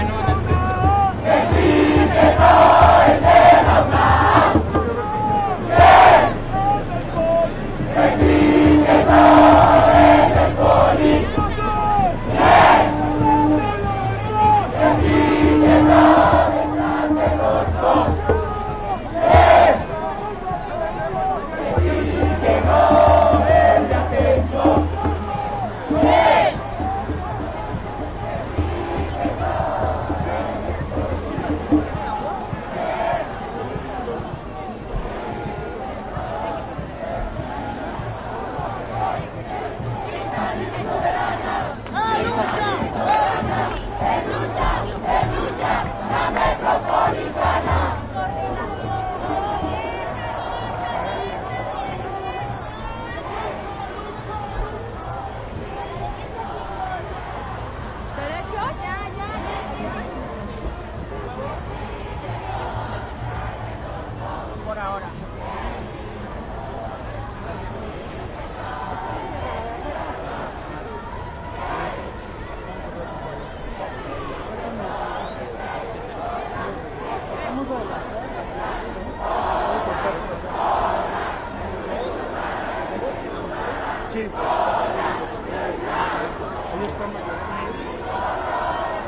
ENTREVISTA-2-T014 Tr5 6
a mob ambient in mexico to commemorate the killed students in 1968... streets, crowd, students, people, mexico, everything in spanish
march, crowd, people, mob, protest